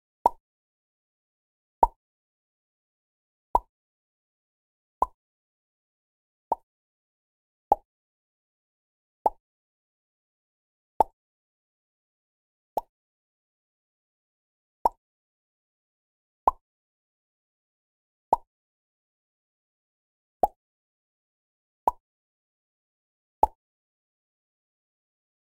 mouth pops - dry
A popping sound made with my mouth. Dry, no ambience.
pop, pops